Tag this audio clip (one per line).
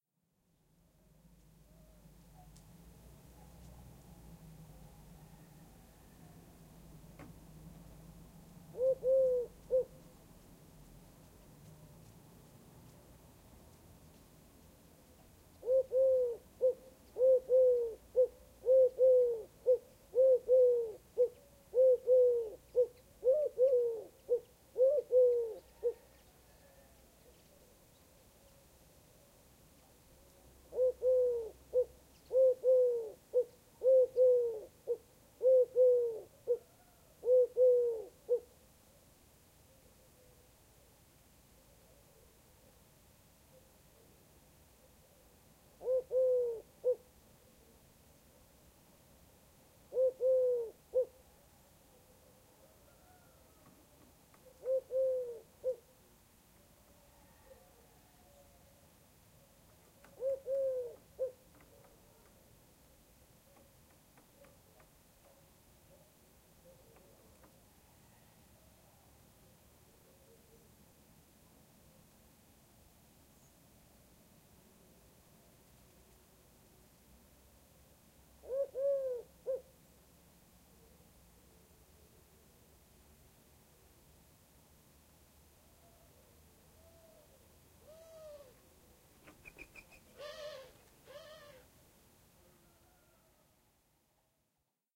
bird
birds
birdsong
field-recording
nature
turtledove